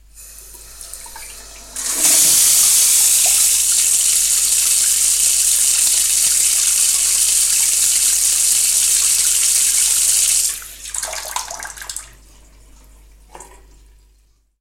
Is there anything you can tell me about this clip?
Water Faucet
turn on faucet, short washing with water, turn off.
Bathroom, sink, faucet, washbasin, water